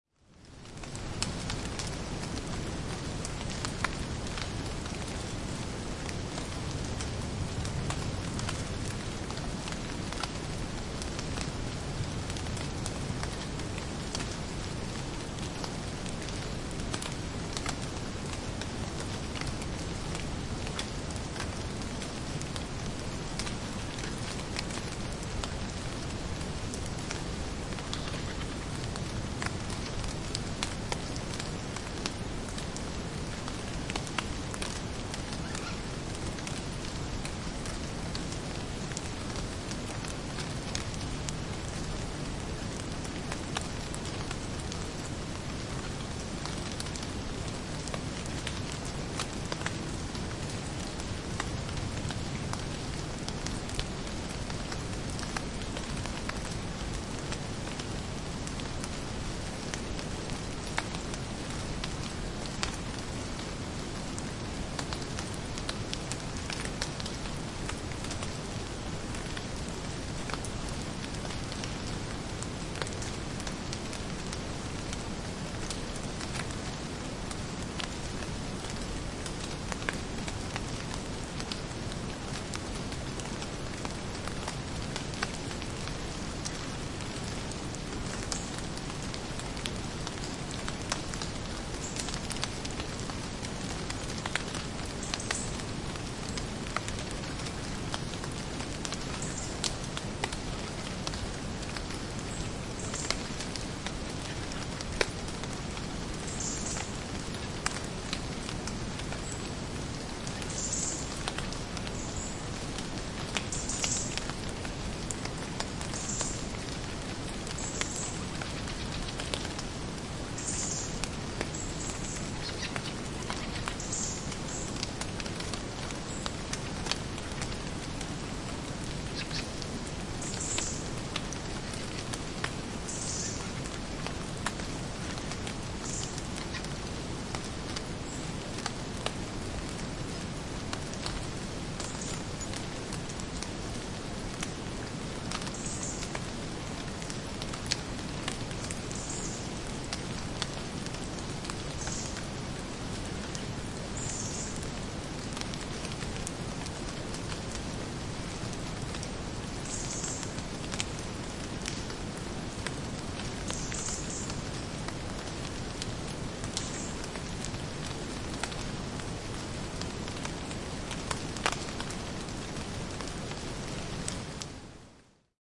Metsä, pisarat putoilevat, syksy / Forest in the autumn after the rain, drops falling on the ground, hum, some distant birds
Syysmetsä sateen jälkeen, huminaa, pisarat putoilevat puista. Loppupuolella vähän lintujen ääniä kauempana.
Äänitetty / Rec: Zoom H2, internal mic
Paikka/Place: Suomi / Finland / Sysmä, Soiniemi
Aika/Date: 01.09.2011